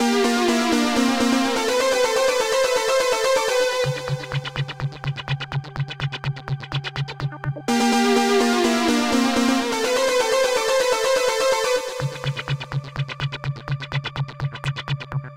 A simple tune which is different but catchy.
This was created from scratch by myself using psycle software and a big thanks to their team.
loopmusic, intro, hypo, humming, dance, electronic, drum, loop, club, glitch-hop, synth, rave, techno, trance, effect, dub, drum-bass, beat, waawaa, ambient, bounce, experimental, dub-step, Bling-Thing, bass, blippy, electro, pan